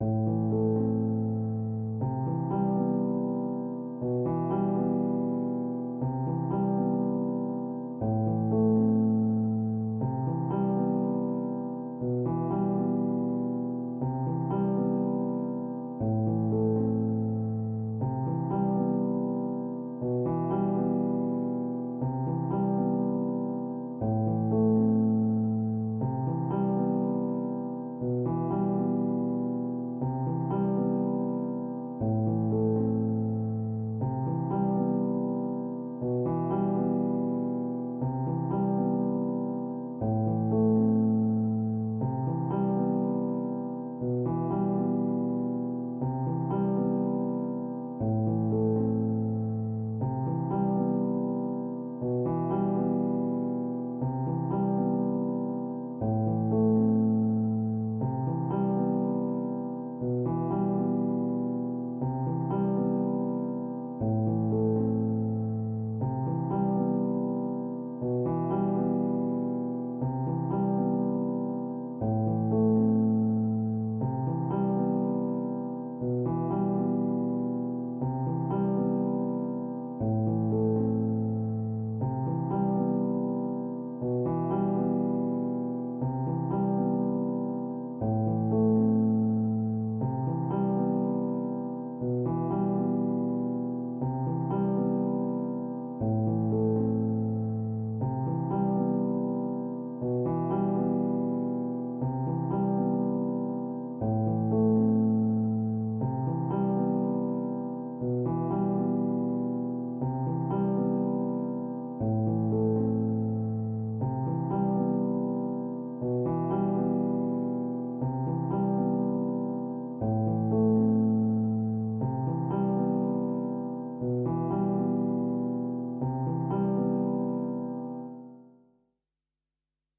Piano loops 029 octave down long loop 120 bpm
loop, free, 120bpm, simple, music, simplesamples, bpm, reverb, Piano, 120, samples